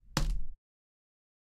Tomar un objeto
taking an object sound
collect, object, take